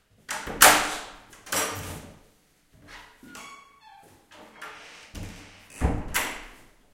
WOOD DOOR 2
wooden, door, wood, slam